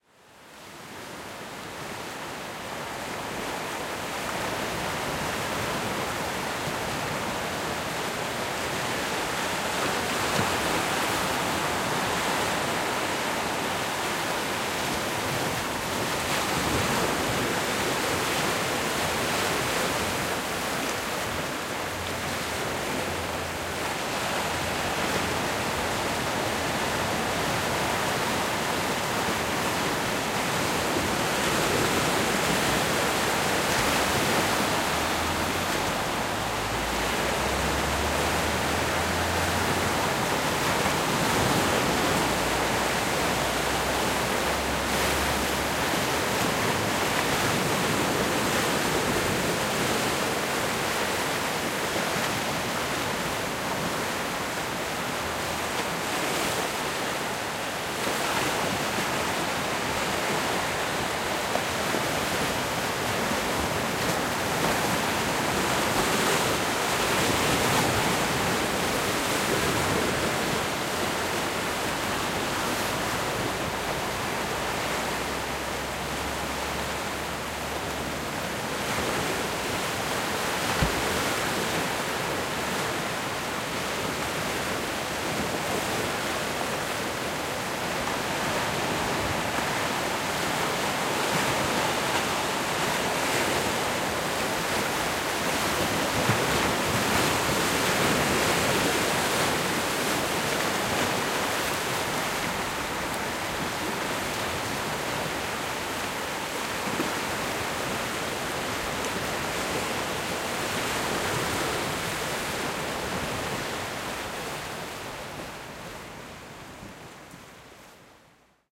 The sound of ocean waves breaking through rocks on the beach. Recorded in Caloundra using the Zoom H6 XY module.